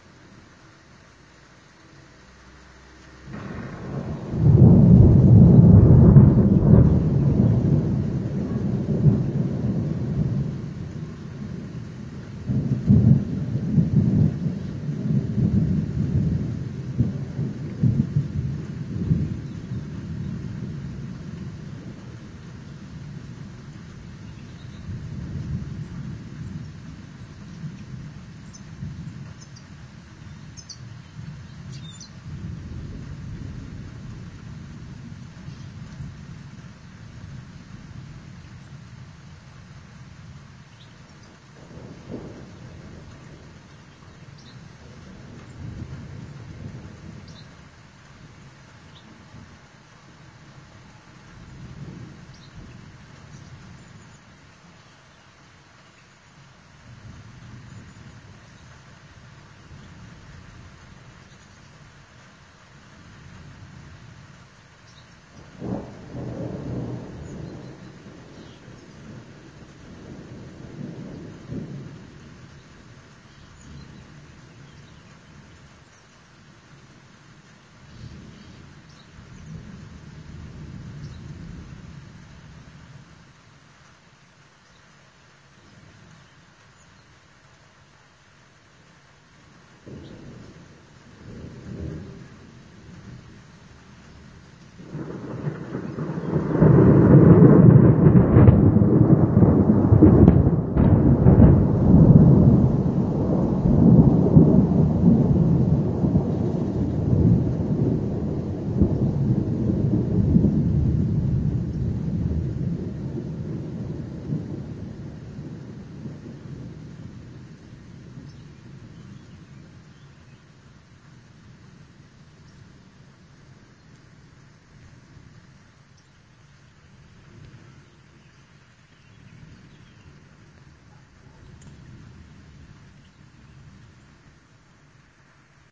rolling thunder and rain
A few minutes of light rain and rolling thunder recorded at Paciano in Umbria, Italy
ambiance, ambience, ambient, atmosphere, field-recording, flash, italy, lightning, nature, paciano, rain, rolling, rolling-thunder, rumble, shower, storm, strike, thunder, thunder-storm, thunderstorm, umbria, weather